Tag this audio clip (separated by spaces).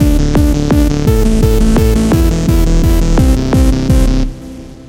fl-studio loop techno